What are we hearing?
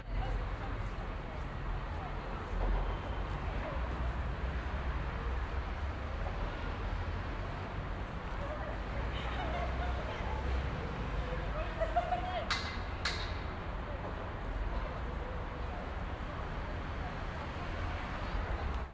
mosquito sound
Here's the original version of the Mosquito device that's designed to repel teenagers from shops and streets. If you're over 25 you won;t hear anything but the street sounds and a girl laughing. Just because you can't hear it doesn't mean it isn't there.
mosquito, teenager